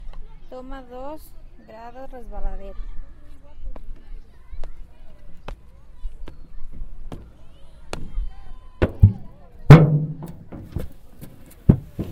Slip steps
Sonido de una persona ascendiendo por las gradas de una resbaladera
children, gradas, park, playground, resbaladera, slip